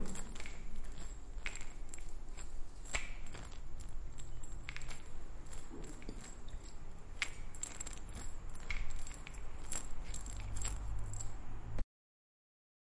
Shaking vitamins in bottle